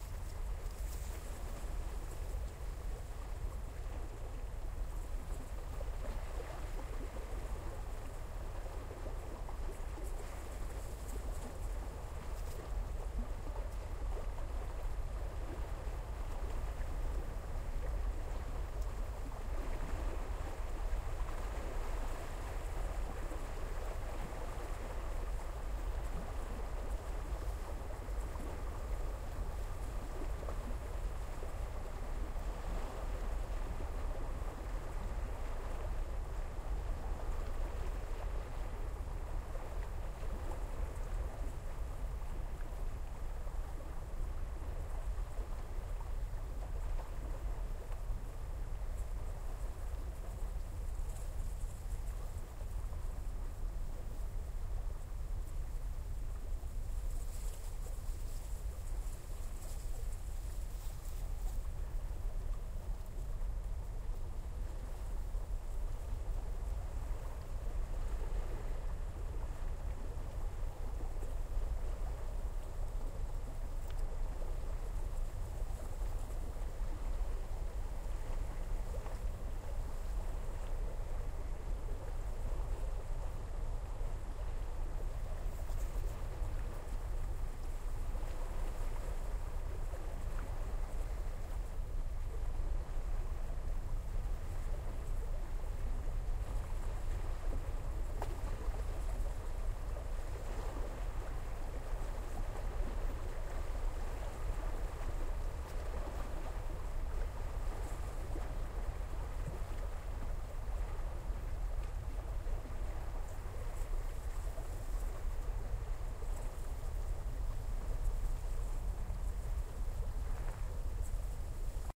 -Meeresrauschen
-Qualität "mässig"